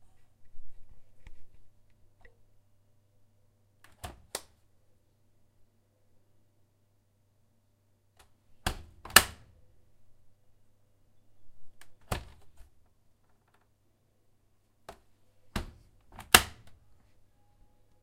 Recording of washing machine door opening and closing and latch clicking.